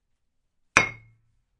hitting glass bottle agaist a wall
hitting a JB's 750 ml whiskey bottle against a wall with out it breaking
recorded with zoom h6
ding, cling, ting, bottle, clang, OWI, glass, bang, wall, boing, hit, glass-botlle, bottles, collision